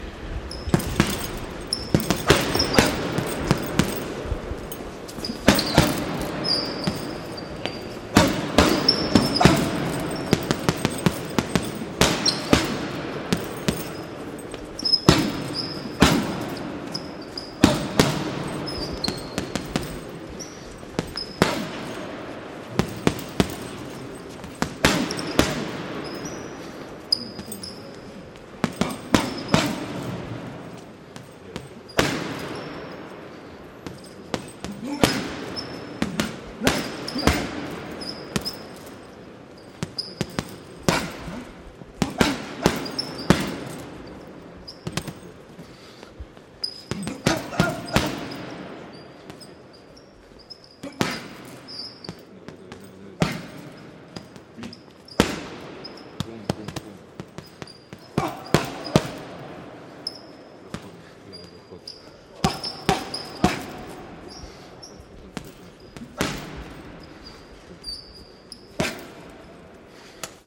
adult professional boxer hits punching bag with trainer comments in Russian 03
Professional boxer hits punching bag while training routine, his trainer gives some comments in Russian language. Huge reverberant gym.
Recorded with Zoom F8 field recorder & Rode NTG3 boom mic.